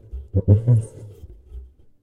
The putipù is a percussion instrument used in Neapolitan folk music and, generally speaking in the folk music of much of southern Italy. (An alternative name is "caccavella".) The name putipù is onomatopoeia for the "burping" sound the instrument makes when played. The instrument consists of a membrane stretched across a resonating chamber, like a drum. Instead of the membrane being stuck, however, a handle is used to compress air rhythmically within the chamber. The air then spurts audibly out of the not-quite-hermetic seal that fastens the membrane to the clay or metal body of the instrument.